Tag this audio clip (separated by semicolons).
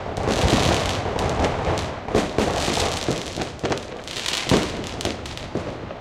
ambient; thunder; c4; shot; fire; delphis; fireworks; s4; explosion